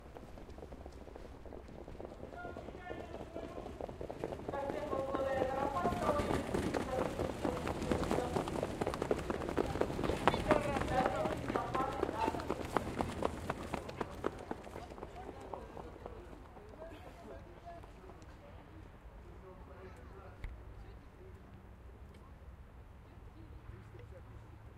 hippodrome.race
Race on the hippodrome. Horses pass by fans.
Recorded 2012-09-29 12:30 pm.
hippodrome, horse, race, racetrack